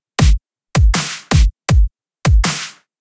80 BPM Dubstep Drum Loop
A Slower Drum Beat I Made In Fl Studio 12,
Kick, Snare, BPM, Drums, Drum, Loop, 80